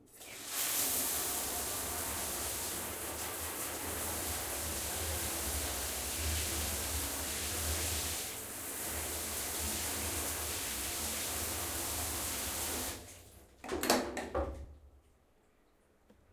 shower
ZOOM H6
bathroom, shower